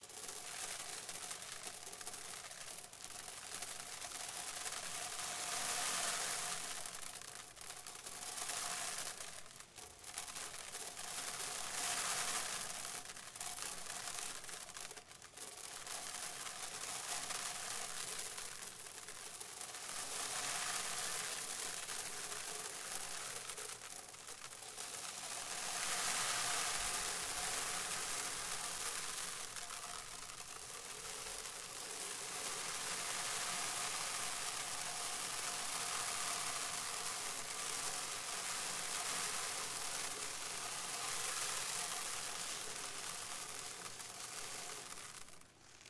Sand Various
Various ways of sand falling into a plastic bucket was recorded with a Zoom H6 recorder using the XY Capsule.
Weather, Sand-Storm, Storm, Sand, OWI